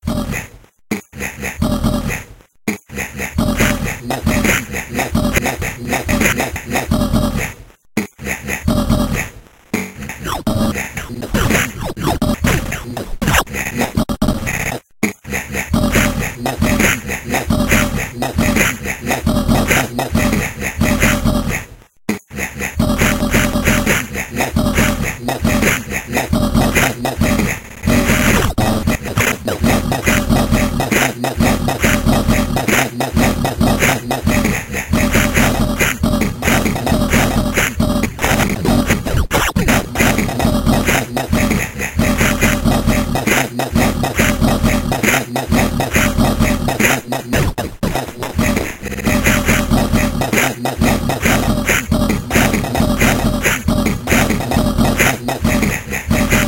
Crushed XHuman136
Another one of my Human Drum Kits at 136 BPM. Dope.
Beat, BPM, vocal, crushed, voice, bit, 8-bit, Human